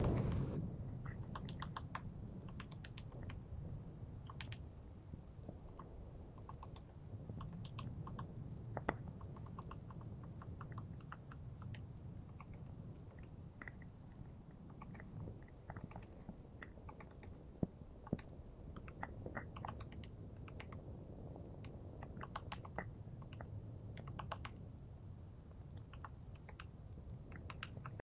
Woodpecker pecking in Yellowstone National Park